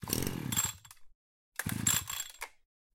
Chainsaw start. Recorded with zoom h4n.
chainsaw, starting, saw